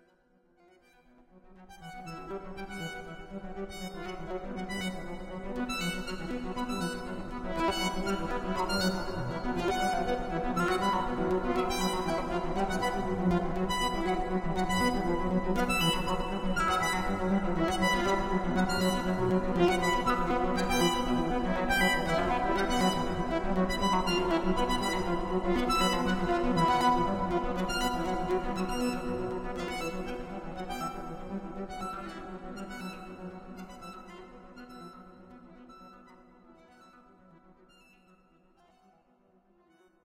Cello b1 124 seq
A synthesised cello sequence - made in response to a request from user DarkSunlight